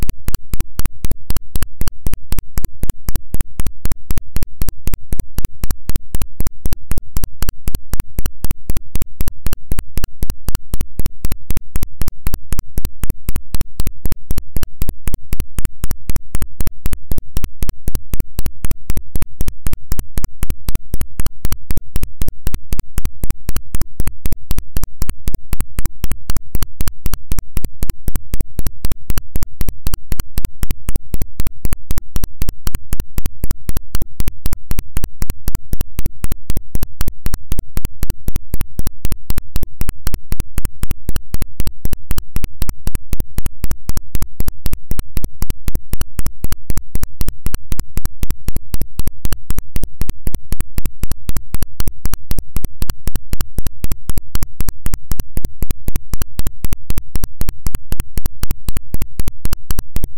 So when I launched Audacity within Gentoo Linux, a strange ground loop occurred. However, adjusting the volume settings within alsamixer caused the ground loop to become different per volume settings. The higher the volume, the less noise is produced; the lower the volume, the more noise is produced.
Have fun, y'all!